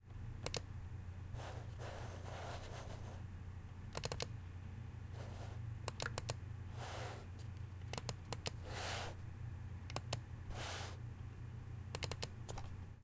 Computer Mouse
Elaine, Field-Recording, Koontz, Park, Point, University